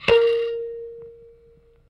Tones from a small electric kalimba (thumb-piano) played with healthy distortion through a miniature amplifier.
tines, bloop, thumb-piano, bleep